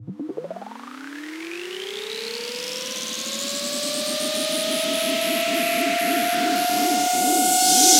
i designed these in renoise stacking various of my samples and synths presets, then bouncing processing until it sound right for my use